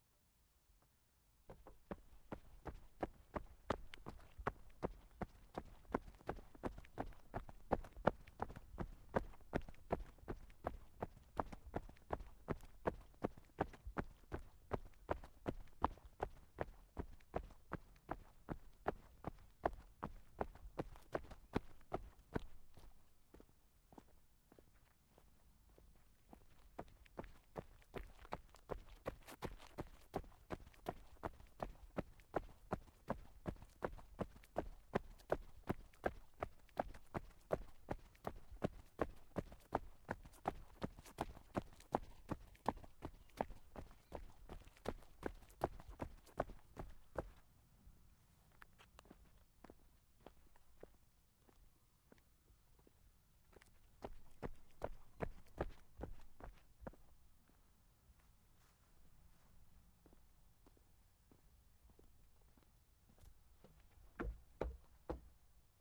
footsteps jog flat sneaker
The sound of flat sneakers jogging on concrete. Recorded with a handheld Sennheiser MKH60 using a Sound Devices 744T.